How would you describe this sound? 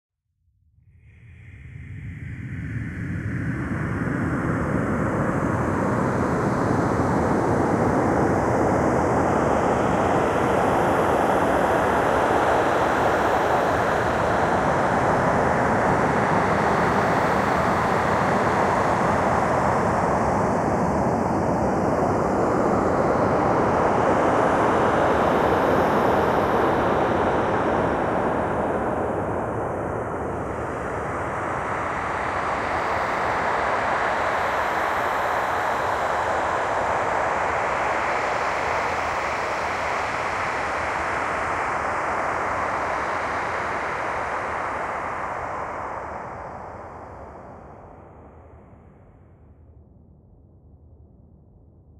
Compilation of (processed) whispers, breaths and synths to obtain short audio-fragments for scene with suspense in a flash-based app with shadows.
air, breath, ghosts, horror, noise, processed, shadows, tension, whispers